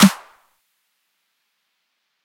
pitched down 909 with a clap and dropped into my process chain.
808, Lm2, punchy, snappy, snare